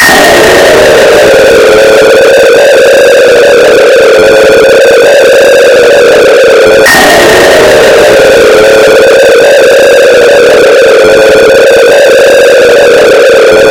Distorted sin wave scream 1 [LOUD]
sine, distortion, vst, sfx, flstudio, noisy, distorted, loud, experimental, hardcore, gabber, noise, dark, hard, processed